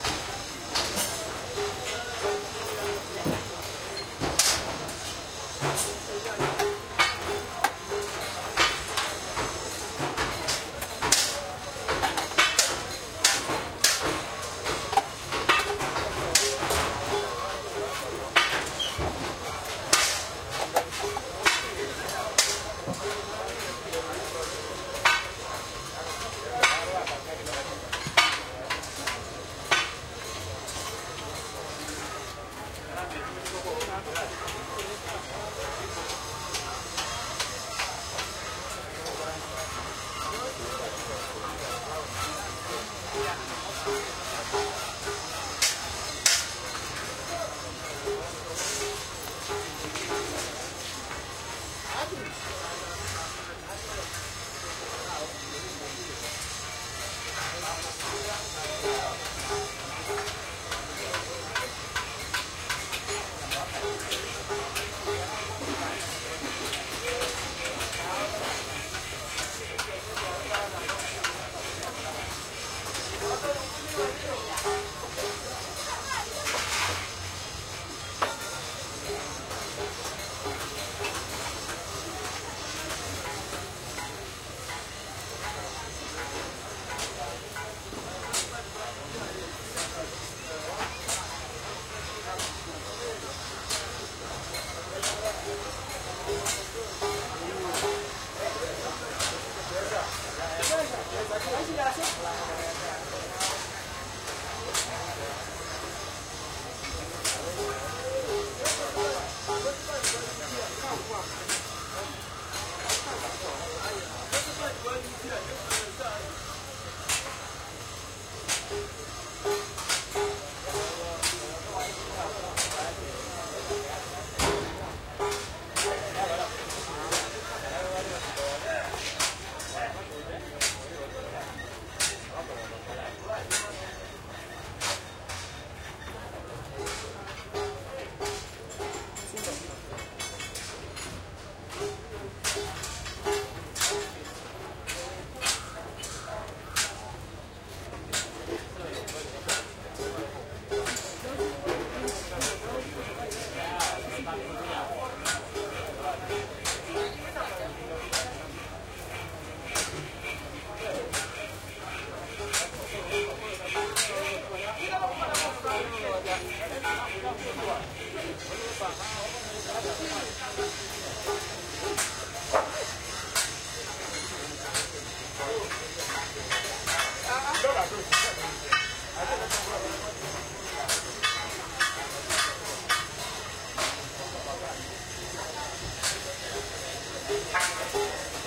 market ext alley hardware repair shops like Waterworld metal banging and grinding lowtrim workshop version Mbale, Uganda, Africa 2016
alley, hardware, market, repair, shops, Waterworld